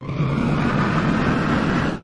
Dark Powerup
A positive effect taking place. A character gains a level. But it is probably an evil character or one that will betray you soon... in your sleep... Created by overprocessing own recording and the Granular Scatter Processor.
Edited with Audacity.
Plaintext:
HTML:
fantasy, game-sound, power-up, negative, rpg, dark, sci-fi, game-design, feedback, level-up, video-game, adventure, role-playing-game, action